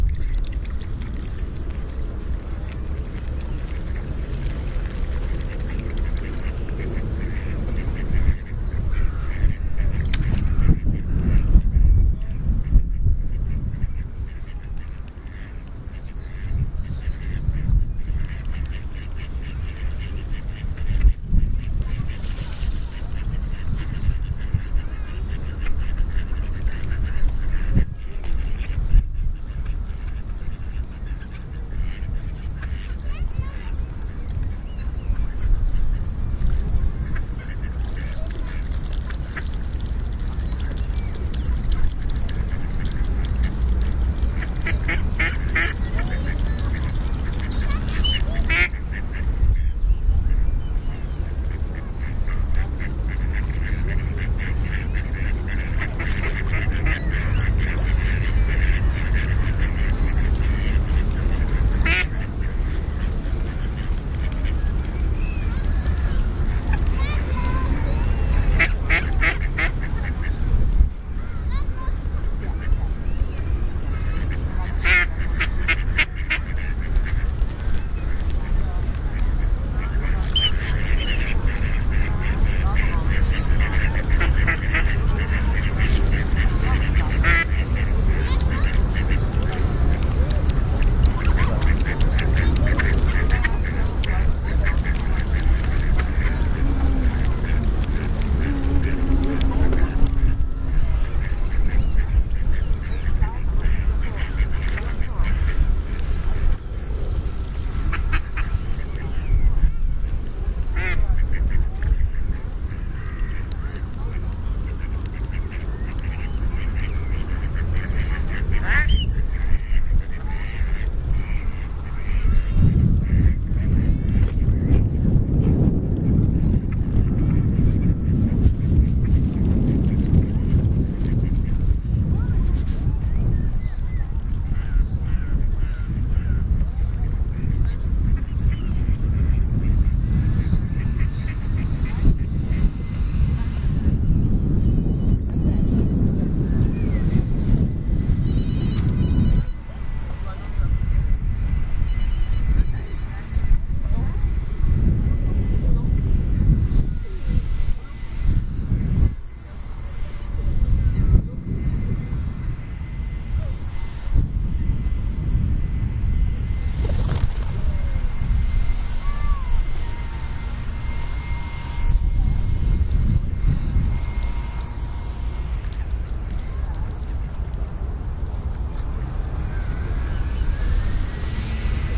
Queensway - Swans at Oval pond
ambient, soundscape, london, ambience